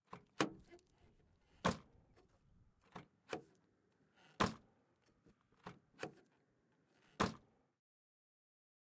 Car door open and close
A few slams of a car door.
automobile, car, closing, door, hard, shutting, slam, slamming, vehicle